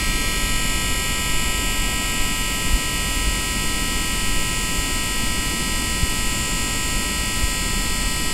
Street light noise
Street light with sodium-vapor lamp produces noise with freuqency of 50 Hz.